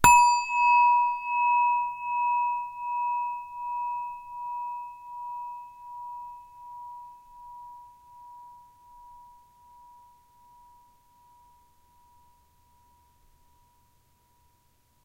Tibetan Singing Bowl (Struck)
8cm Tibetan singing bowl struck with wood
ring, bowl